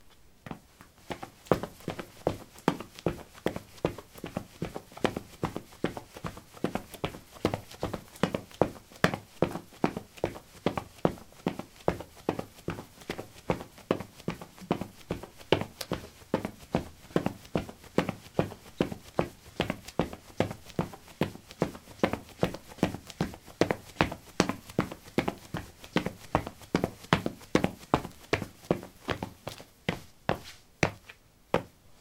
Running on concrete: sneakers. Recorded with a ZOOM H2 in a basement of a house, normalized with Audacity.